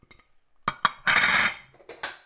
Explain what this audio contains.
Stacking Plates 02
Stacking plates after washing-up, clashing sounds
clink, dishes, kitchen, plates, stack, washing-up